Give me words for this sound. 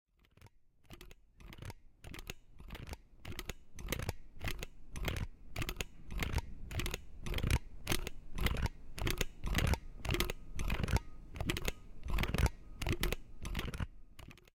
scoop insides
used a metal ice cream scoop repeatedly with the scoop end at the microphone.
ice-cream, metal, scoop